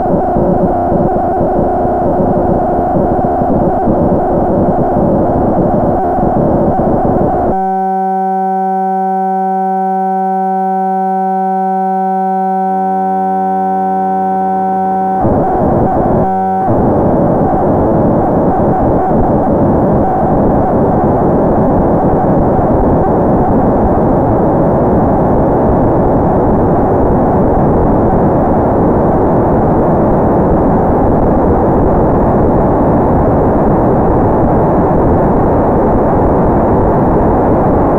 Kamioka x-Mod 2 mono 0.45-0.51
Another sound from the little dare I am running with user gis_sweden.
The challenge was to create a sound where 2 oscillators cross-modulate each other.
gis_sweden will use his modular synth. I will use my virtual modular synth Kamiooka.
You can hear gis_sweden's sound here:
My sound looks very interesting if you change the display mode to spectral.
For this sound, I zoomed further on the region of cross-modulation values that produce an interesting sound.
The parameters I have used:
2 sin oscillators
cross-modulate each other (FM)
Cross-modulation ramps up linearly from 45% to 51% during 20 bars at 120bpm
Created with Kamiooka in Ableton Live
Sound converted to mono in Audacity. No effects or processing.
corss-modulation, kamiooka, virtual-modular, VST, x-modulation